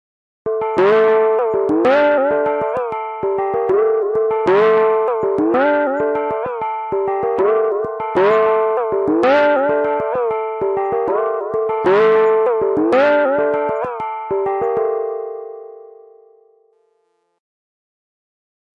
Madness Bell Loop 1
Ableton, Bells, Computer, Crazy, Dark, Delay, Loop, loop-Synthesis, Lost, Mad, Madness, micro-loop, Minor, Pitch, Pitch-Bending, Resample, Sampler, Strange, Synth, Synthesis, Synthesizer, Tubular, wavetable